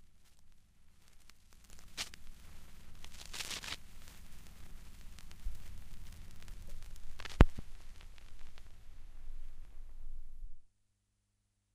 Record needle (stylus) runs off the end of the record (LP); rumbles and clicks. Stereo.